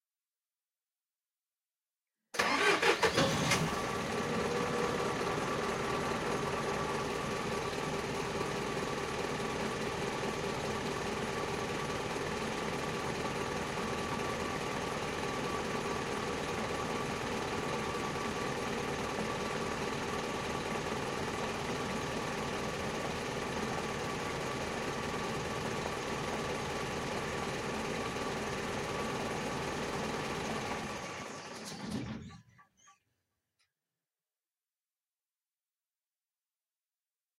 motor de camion / truck engine
motor de camion encendiendose y apagandose
grabado con Xiaomi redmi 6 y Rec Forge II
truck engine running on and off
recorded with Xiaomi redmi 6 and Rec Forge II
automobile, car, engine, motor, vehicle